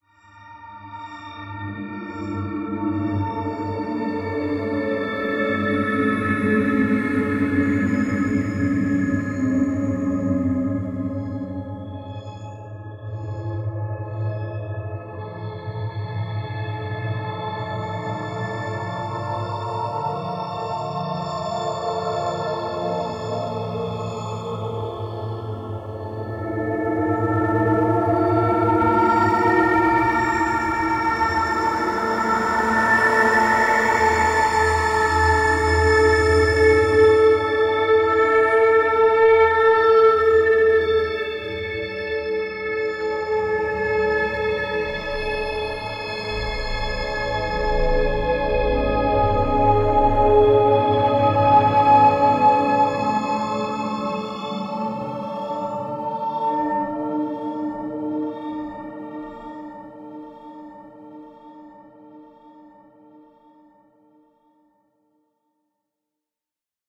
An odd soundscape done with MetaSynth.
ambient, drone, evolving, metasynth, soundscape